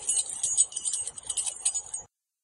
Sound of keys, recorded with a very simple microphone and edited to be cleaner.
door, jingling, key, keys, open, shake